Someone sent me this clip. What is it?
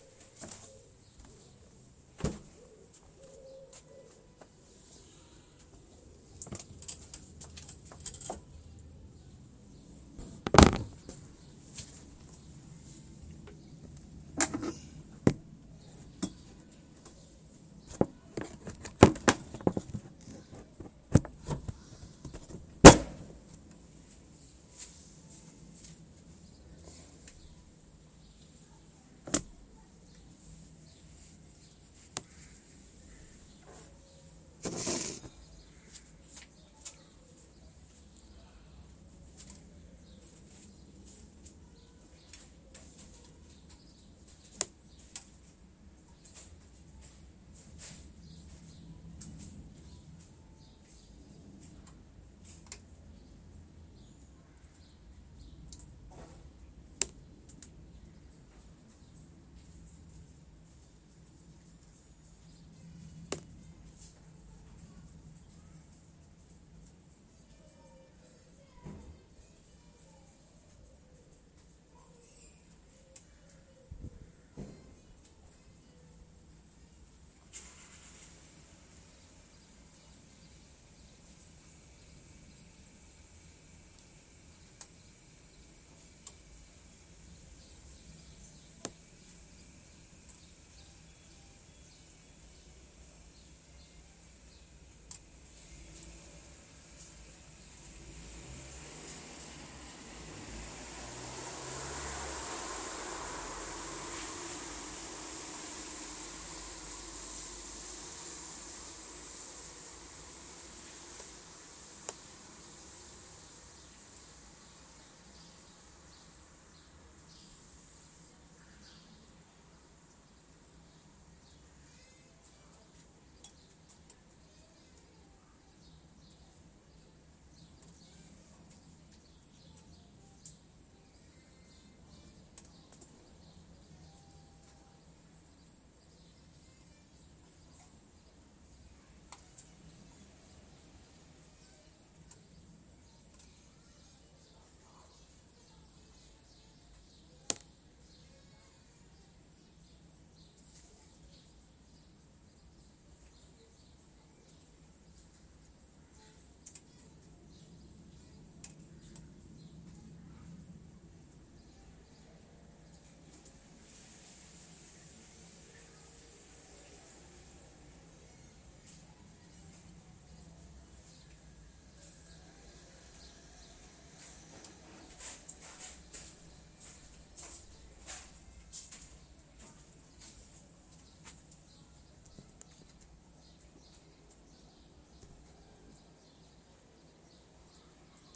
morgenstemning juni
Morning on the balcony. Uncut. A bit of rumble in the beginning :)
ambience, recording, background-sound, ambient, Field, atmosphere